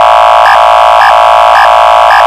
an electronic machine running, maybe not properly
broken, buzz, electronic, loop, loud, machine